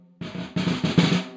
Snaresd, Snares, Mix (14)
Snare roll, completely unprocessed. Recorded with one dynamic mike over the snare, using 5A sticks.
acoustic, drum-roll, roll, snare